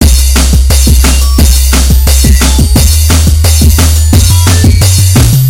Loop175BPM
A set of Drum&Bass/Hardcore loops (more DnB than Hardcore) and the corresponding breakbeat version, all the sounds made with milkytracker.
175bpm, bass, drum, hardcore, loop